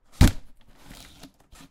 Open package box parcel
Open or kick open a package.
box, cardboard, carton, kick, open, package, parcel